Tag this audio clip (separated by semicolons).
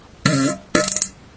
aliens; beat; explosion; fart; flatulation; flatulence; gas; laser; noise; poot; snore; space; weird